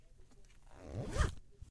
Opening and closing a zipper in different ways.
Recorded with an AKG C414 condenser microphone.